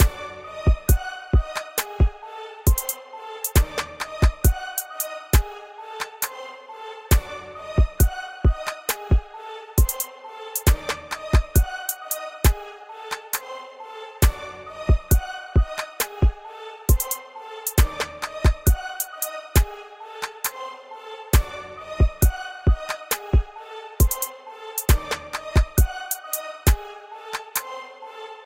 After this I'm going to be making different kinds of music, learning about sampling and making soulful music along with this. Like always this is made in Fl studio. Drum kits: DoubleBeats Hard Trap kit part two and Creativity Drum Kit. VST - Gladiator, Nexus, Direct Wave. 9/24/14 4:51am
cool, disc, drum, unearthly, evil, Trap, dark, bizarre, loops, New, compact, struck, kits, dreamlike, Cluster, Hip-hop, Orchestral, design, Suspense, 2014, group, music, dream, free, edit, vst, telephone